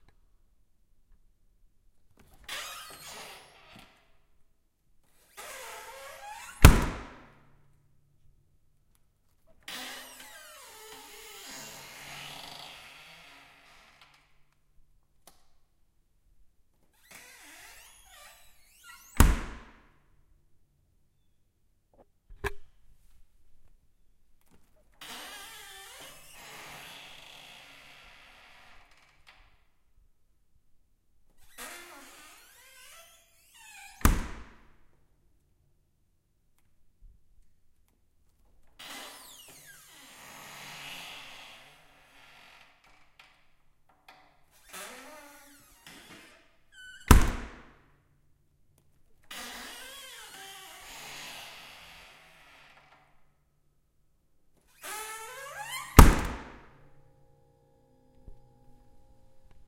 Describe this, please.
I repeatedly opened and closed the door of our freezer in the garage. Sounds kind of creepy.
Recorded with the built-in mics on a Zoom-H4N.